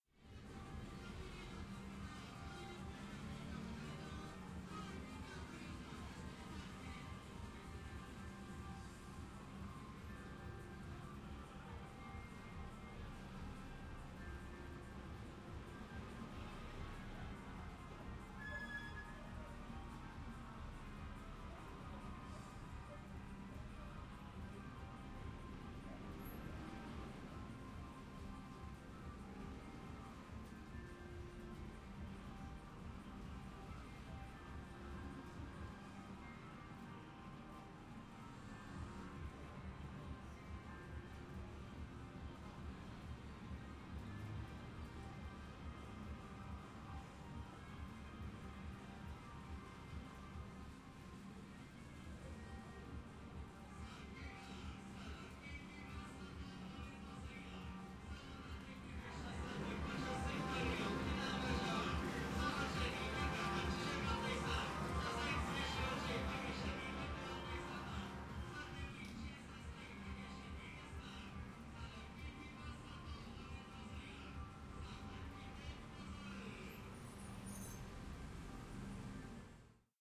This is a recording near the entrance of a pachinko parlor (kind of slot machine casino in Japan).
pachinko-parlor, cityscape, pachinko, gambling